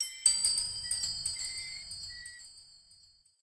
0005 Crystal Lamp with Swing Front
Chandelier that swings in a hall. It hangs in a rusty metal chain.
crystal, swing, echo, metal, chain, hall, pling